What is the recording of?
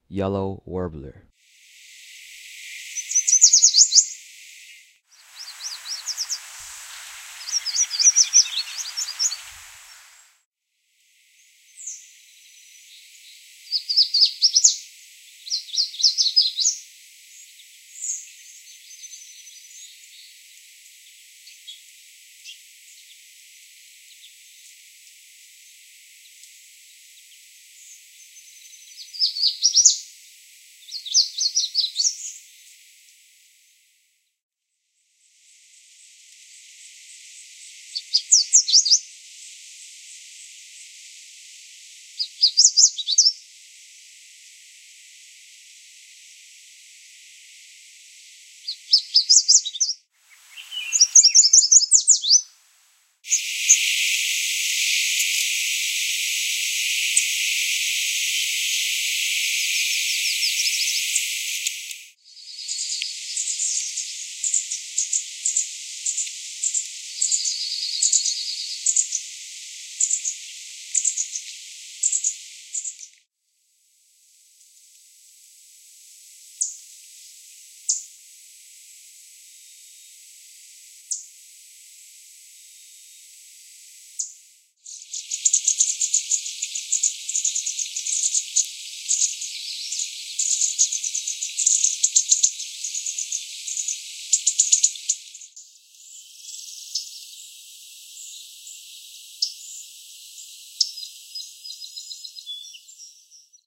Yellow Warblers are very common agricultural birds. They commonly nest in garden bushes. There are two different songs they a male sings, the dawn and day songs which are included in this track. There are also some bubbly sounds that are coming from fledglings and chips of the parents.
bird, birds, bird-song, call, nature, north-america, song, wild